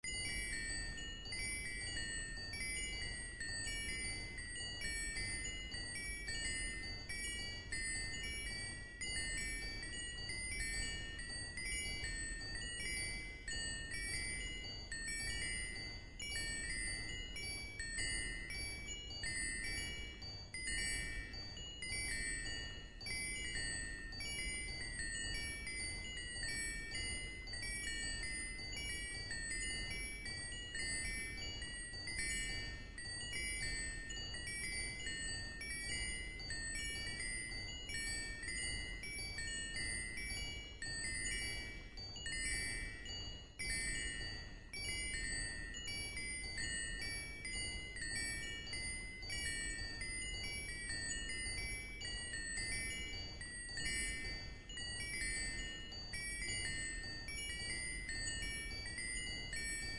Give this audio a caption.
several spoons v2 (1'00")
Using Logic, I took my arrangement from "several spoons" (see elsewhere in this pack), and trimmed the original source material further, removing the entire attack. The length of the individual segments changed, but the concept of the loop remained. Again, I chose to not normalize in order to avoid unwanted room noise.
math, bell, MTC500-M002-s14, windchime, chime, tablespoon, ding, ring, loop, ringing